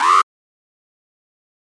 1 short alarm blast. Model 2